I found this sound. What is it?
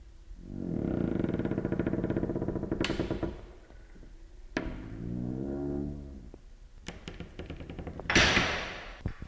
I recorded this sound back in 2002. A bathroom door slowly being opened and then shut.
door, squeak